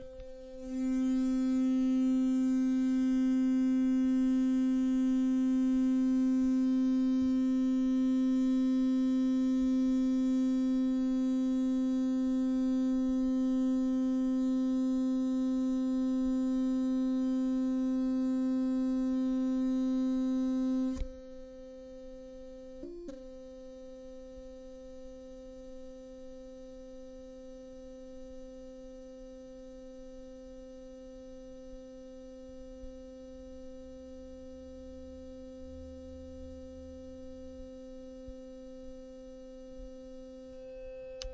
The two type of vibrations of tooth brush
brush, vibrations, tooth